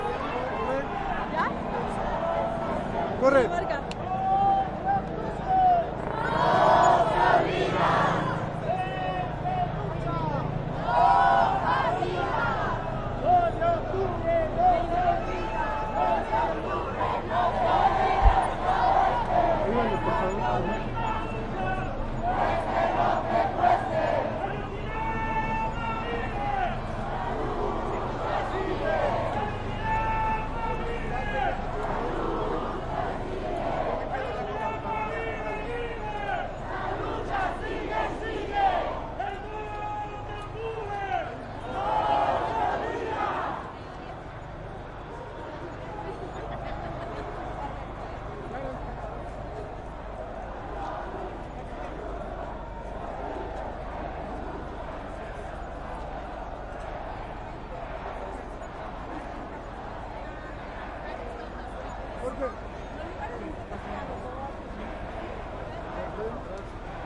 ENTREVISTA-2-T022 Tr5 6
a mob ambient in mexico to commemorate the killed students in 1968... streets, crowd, students, people, mexico, everything in spanish
crowd, mob, people, protest